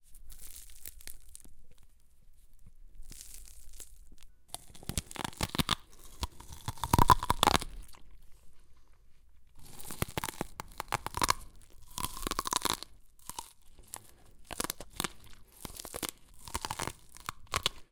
Corn crunch
Miked at 3-4" distance.
Corn bitten off husk and chewed.
snap, foley, food